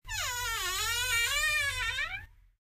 Door Creak 01
My house sure has a ton of squeaky old doors...
Recorded on an iPhone.
close, creak, door, wooden, squeak, open, creaky